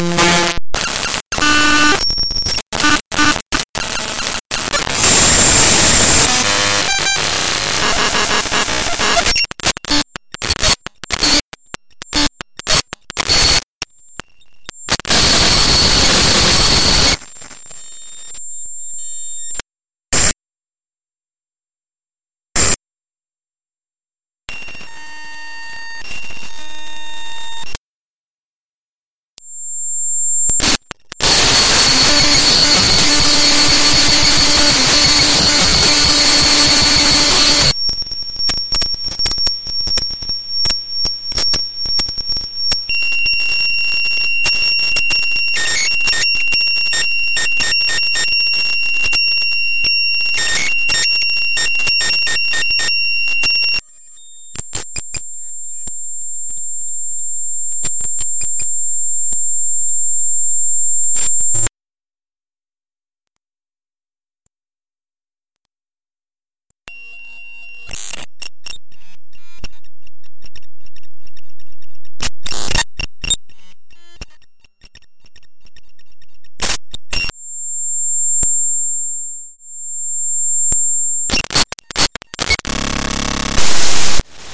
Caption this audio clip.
importing a blender model i made into audacity using the import raw function.
(warning: contains high frequency noise)